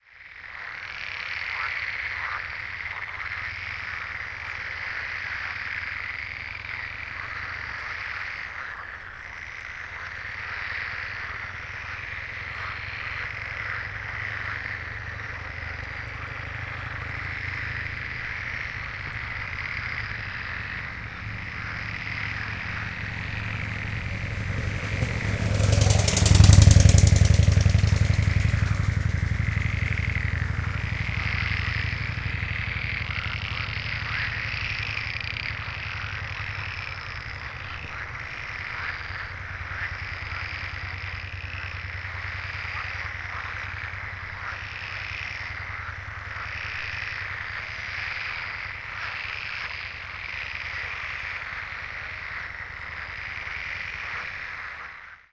a bike was crossing while recording